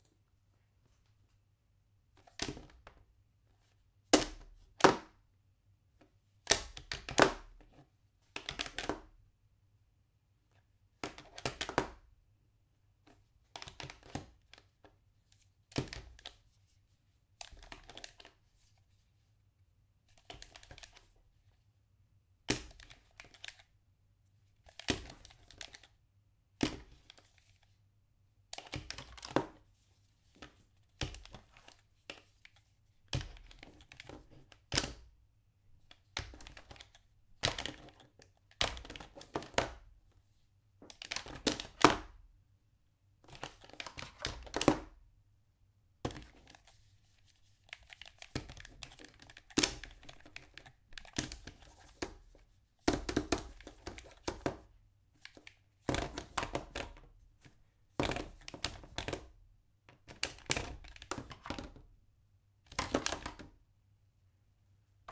gamepad video game controller
Dropping a gamepad made of plastic from low altitude on a wooden surface, and pushing some buttons.